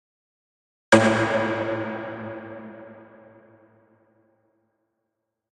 Pipe Reverb Bomb
A Mid range pipe synth reverb bomb FX.